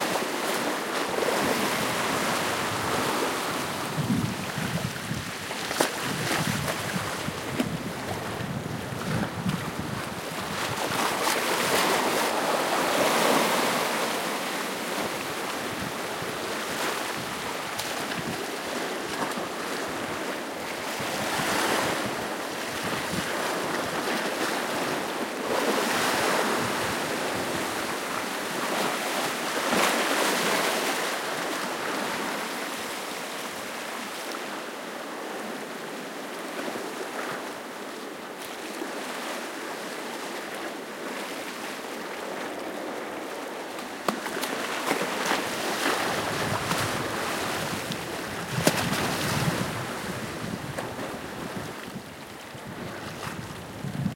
Waves lapping and smacking against concrete wall. Stereo recording on Zoom H1. A little wind noise in places.